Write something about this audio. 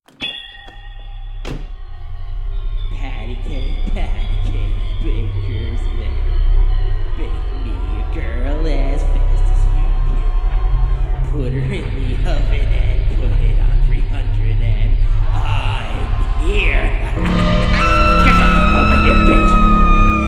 psycho sample
me playing a psycho w/ evil samples in the background.
horror, psycho, dark, evil